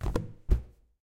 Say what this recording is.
Percussive sounds made with a balloon.
acoustic, rubber, percussion, balloon